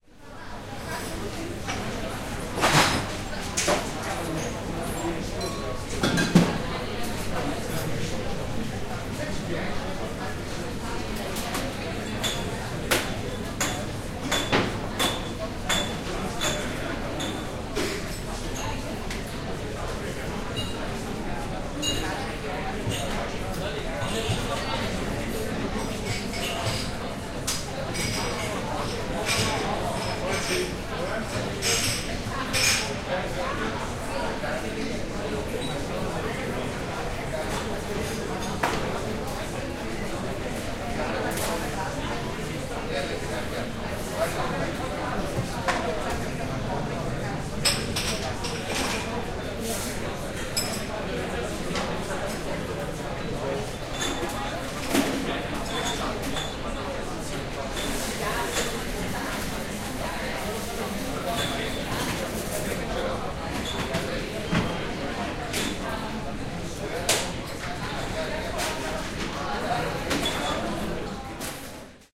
Coffeeshop in Vienna, Austria
Inside a coffee shop in Vienna, Austria. Voices, Ambience. XY recording with Tascam DAT 1998, Vienna, Austria
Vienna, Voices, People, Austria, Atmosphere, Coffee